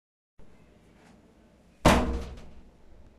cerrar puerta
recorded from my house how I closed the door tightly
close, closing, door, doors, heavy, Metal